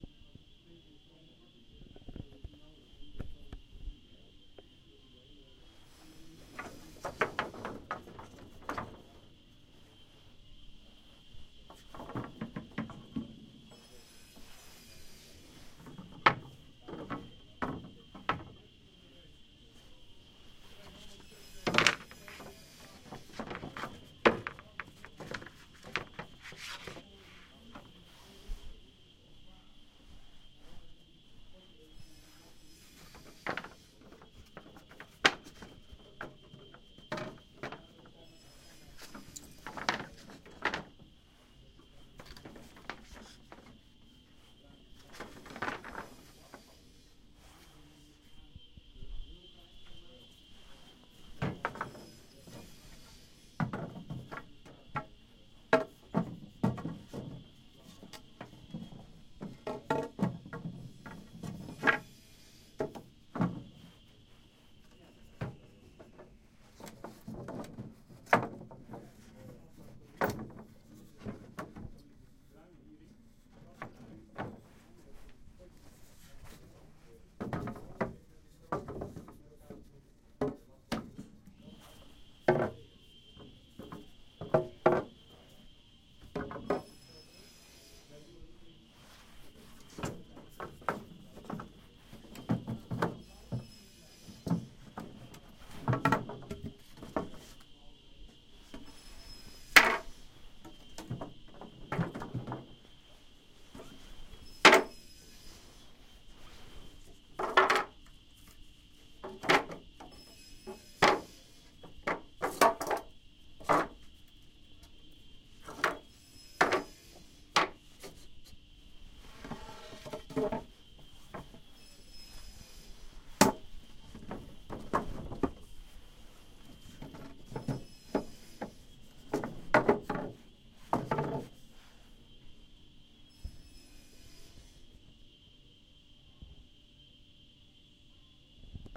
While selecting beams of wood in a timber shop the sound of wood beams stumbling against each other was recorded with a zoom H2 recorder.